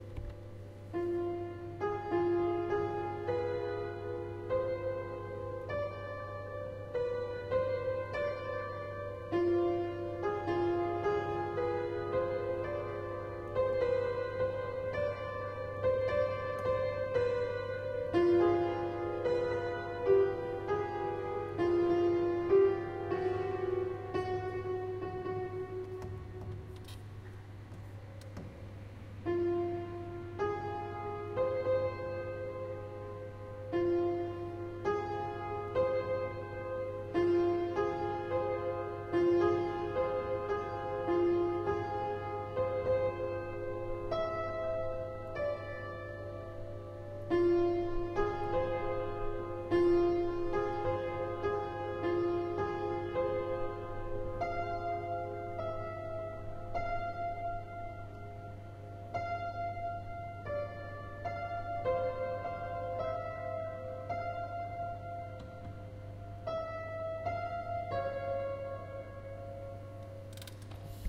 Field-recording of a homeless man playing some piano in central station hall at Leeuwarden trainstation, Netherlands.